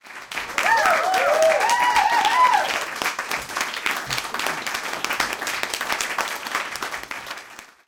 People cheering at a small (40 people) concert.
The location was Laika and the artist was Princessin Hans.